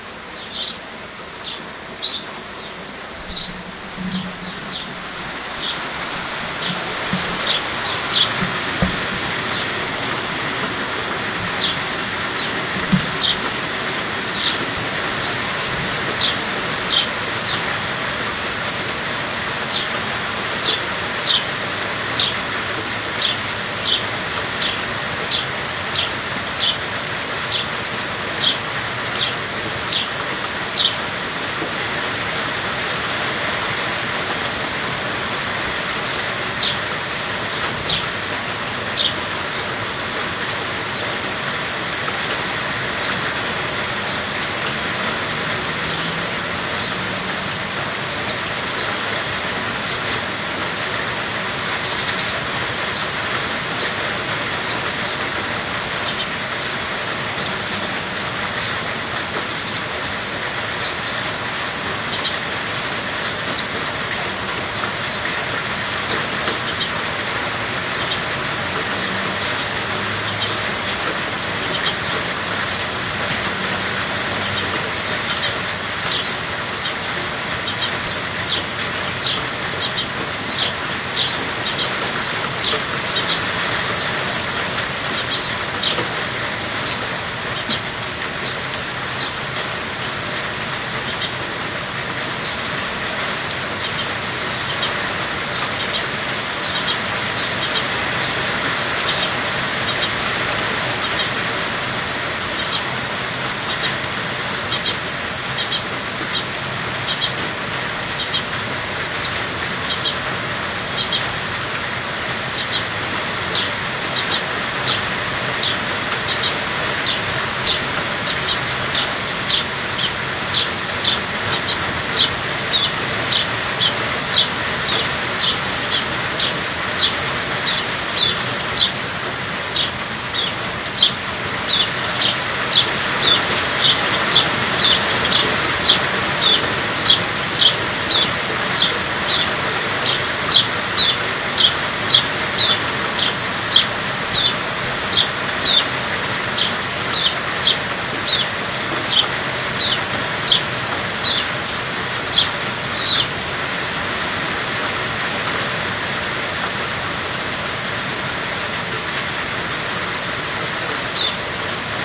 recording of a australian backyard with birds chirping in the rain.